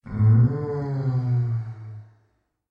Male long groan hIgher reverb
My own groan I use to add effect in music mixes - slight reverb and speed reduction added via Audacity